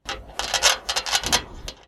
FOUCHER Simon 2014 2015 Scraping
HOW I DID IT?
Sound recorded : hand scraping metal - With dynamical microphone
Using Audacity : Amplify: 9.0 dB
HOW CAN I DESCRIBE IT? (French)----------------------
◊ Typologie du son (selon Pierre Schaeffer) :
X'' ( Itération complexe )
◊ Morphologie du son (selon Pierre Schaeffer) :
1- MASSE :
Son « cannelé » - plusieurs hauteurs perceptibles
2- TIMBRE HARMONIQUE :
Timbre brillant et « grinçant ».
3- GRAIN :
Grain rugueux et sec, abrupte.
4- ALLURE :
Aucun vibrato, aucun trémolo.
5- DYNAMIQUE :
Attaque abrupte.
6- PROFIL MELODIQUE :
Variations scalaires.
7- PROFIL DE MASSE :
Site :
Une seule couche de son en variations scalaires
Calibre :
RAS